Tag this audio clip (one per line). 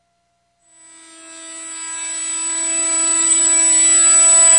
digital
fm
granular
synth
reverse
modular